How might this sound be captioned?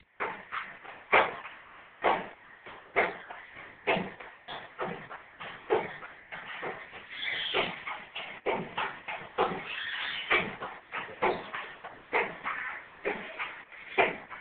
"Over the hill" escalator, recorded on dumbphone.